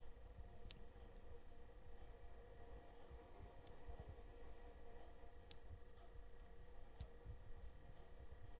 silent sound of some waterdrops falling in a metallic washbasin